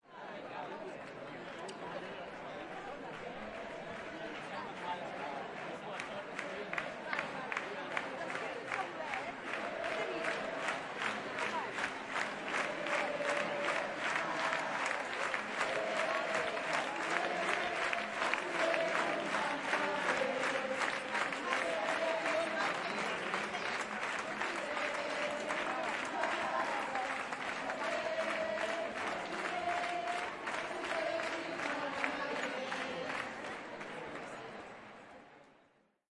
AMBIENCE MANIFESTATION SANT CELONI (PASSI-HO BÉ)
people singing to have fun in Catalan making clear reference to Spain